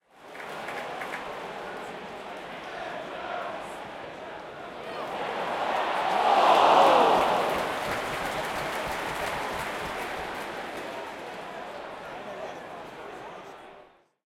Football Crowd - Near miss Ooh 3 - Southampton Vs Hull at Saint Mary's Stadium
Recorded at Southampton FC Saint Mary's stadium. Southampton VS Hull. Mixture of oohs and cheers.